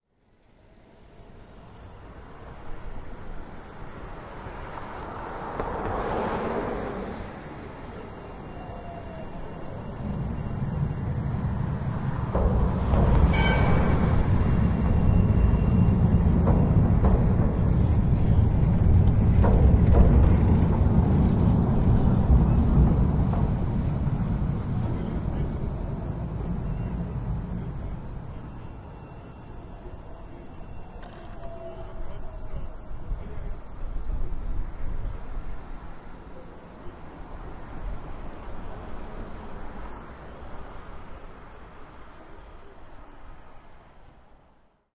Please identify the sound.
I was standing on the bridge and the tram drove onto the bridge. Very specific sound for Amsterdam, Holland.